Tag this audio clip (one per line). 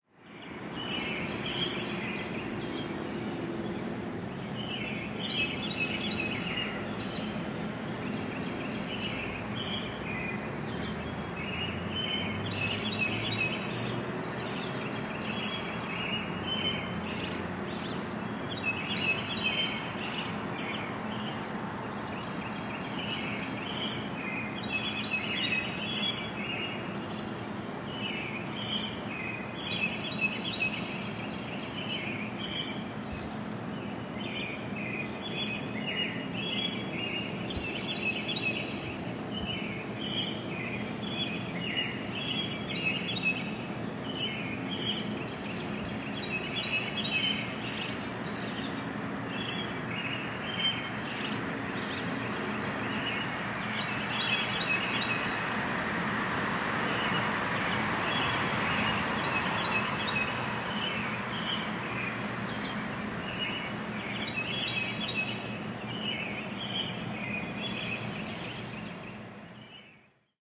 PaisajeSonoroDesdeTuVentana
WLD2020
Cuarentena
ColectivoViajeSonoro
Cratila